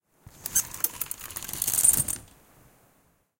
Raw sound of short umbrella opening slowly, recorder with tascam dr07